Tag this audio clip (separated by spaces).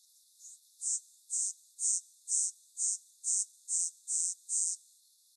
field-recording insect nature night processed summer